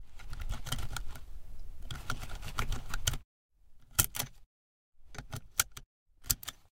Seat belt buckle wiggle VEHMECH
Seat belt buckle being wickled harshl.
metal, vehicle, Seatbelt, OWI, mechanical, wiggle, clanging, VEHMECH, car